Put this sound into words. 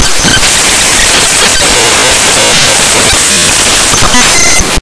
Brutal glitch noises.
noise, loud, lo-fi